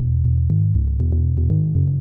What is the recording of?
bass e gsharp dsharp-03
bass e gsharp dsharp
bass, club, dance, dsharp, electro, electronic, gsharp, house, loop, rave, synth, techno, trance